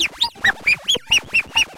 sonokids-omni 23
toy, game, electronic, happy-new-ears, beep, analog, moog, strange, funny, speech, synth, sound-effect, electro, bleep, analogue, lol, ridicule, filter